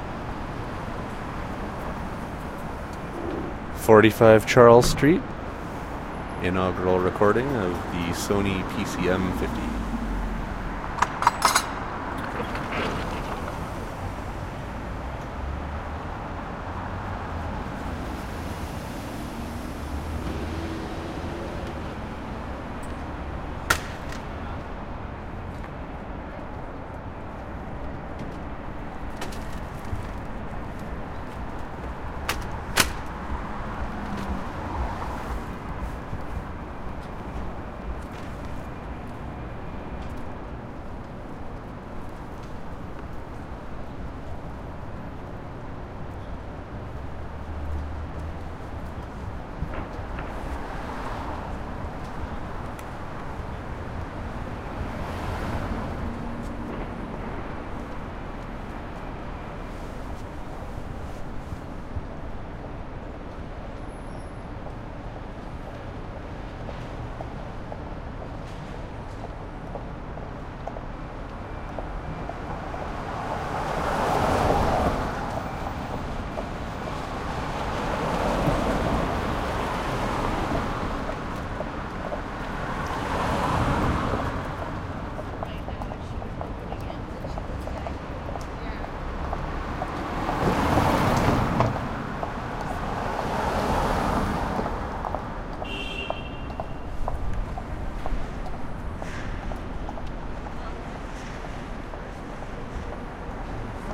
45 Charles ST AMB Traffic
ambience, car, pass, sidewalk, toronto, traffic